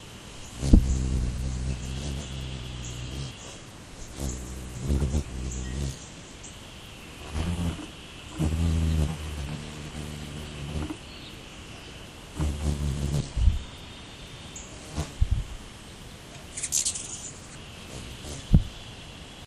hummingbird fight and call
2 hummingbirds fly after each other and fight. One hummingbird calls out loudly, too
bird, humming, song